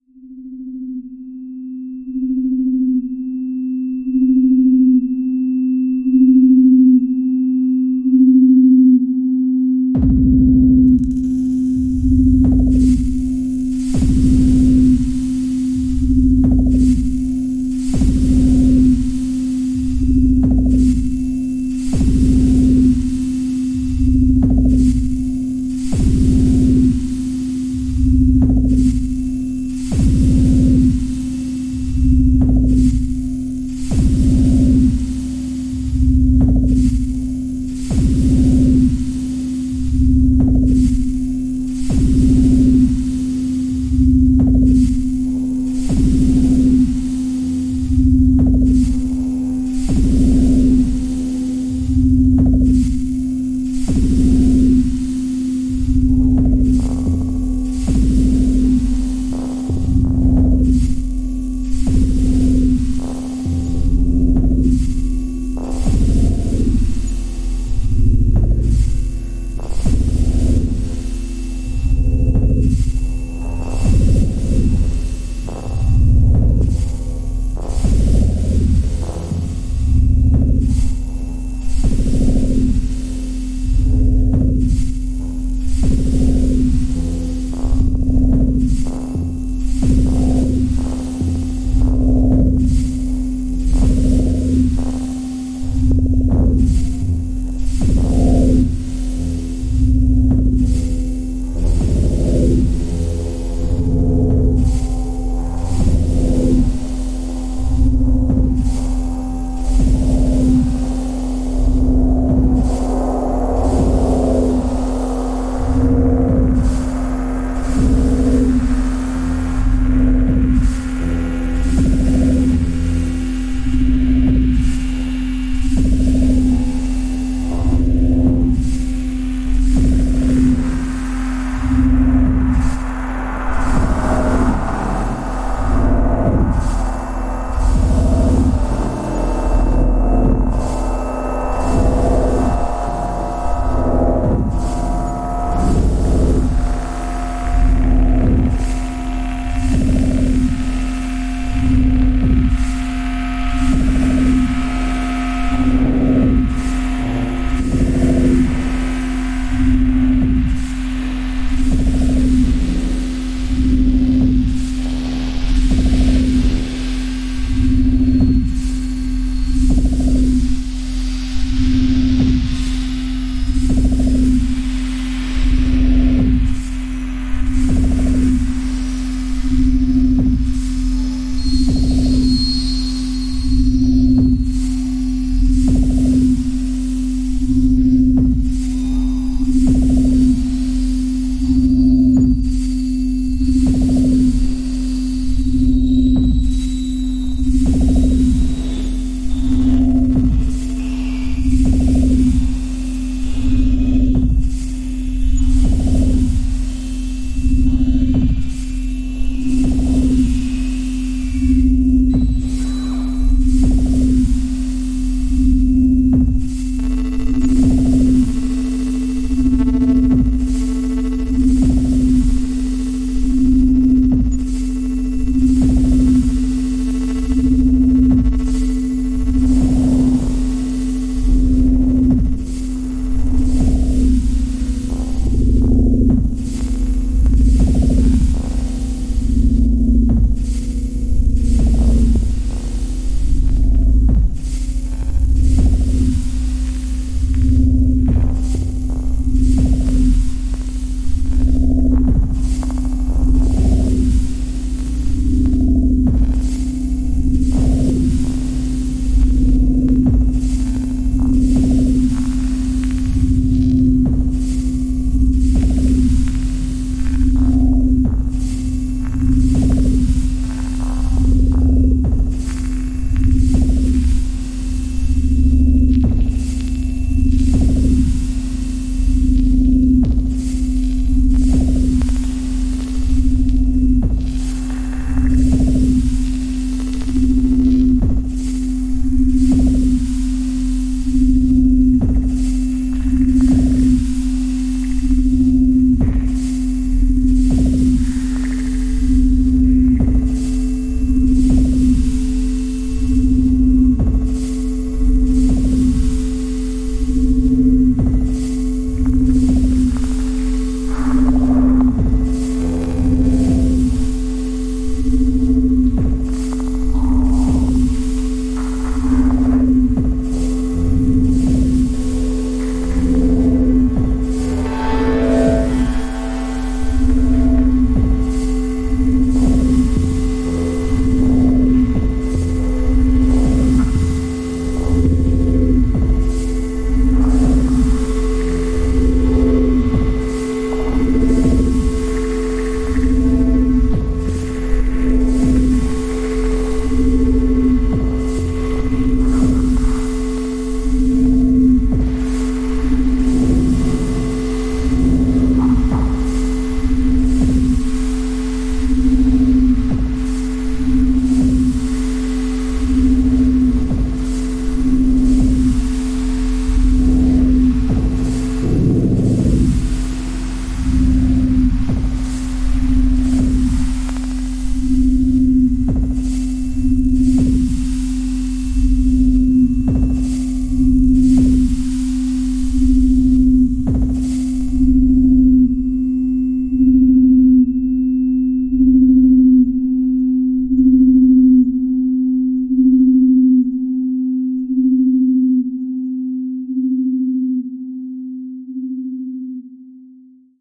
There is a little man in the machine
EXPERIMENTAL FIELD-RECORDING recorded with ZOOM pocket recorder.
MASTERED and MAKEOVER with FL STUDIO MINIHOST - GLACEVERB - DRUMBOX.
ambience, ambient, atmosphere, field-recording, noisescape, soundscape